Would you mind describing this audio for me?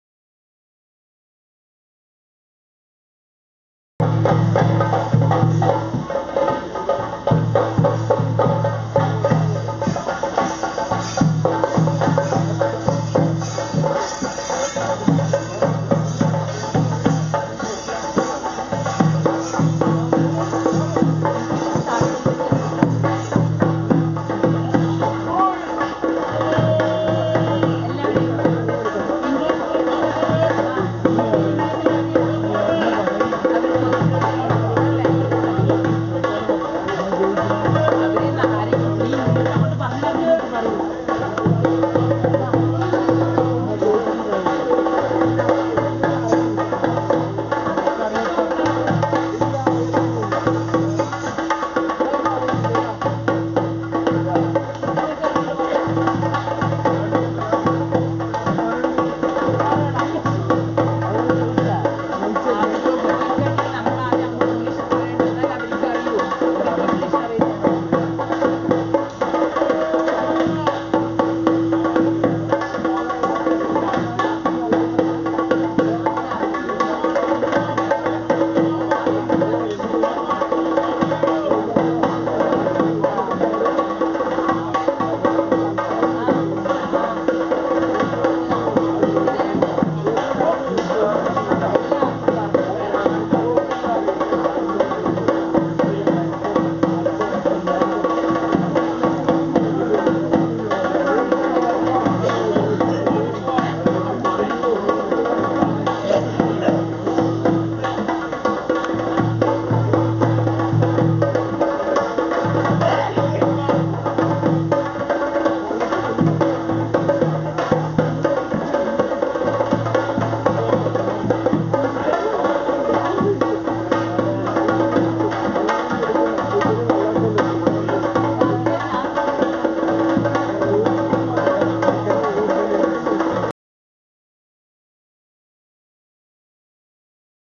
1 Theyam entering the shrine area
Drumming as the dancer is prepared
spirit, ritual